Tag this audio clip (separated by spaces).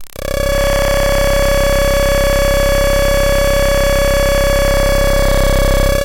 drone noise diy APC